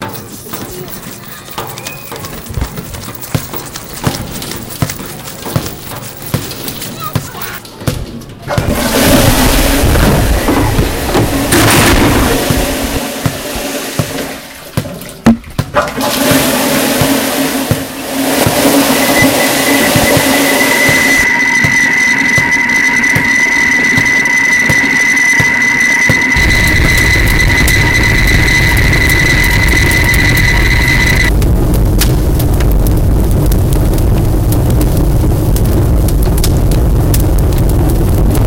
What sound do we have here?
TCR soundpostcard-manon,cléa,marie,anouk
France, Pac, Sonicpostcards